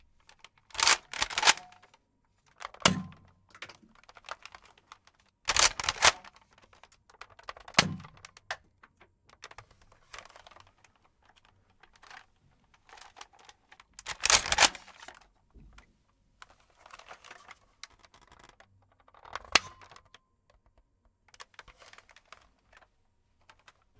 Nerf Surgefire Reload, Shot & Rattle
Nerf Surgefire being shot and reloaded. This gun squeaks so much...
Gun, Toy